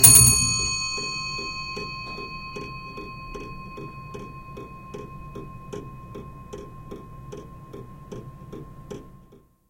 18th Century Scottish clock rings once with natural end. This is such a sweet, unusual clock. Recorded with a Schoeps stereo XY pair to Fostex PD-6.